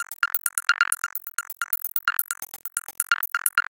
Sequence of 32 regular bleeps with a dirty attack. Tuned about F, high frequencies.
bip, chip, granular, lo-fi, loop, sync, tempo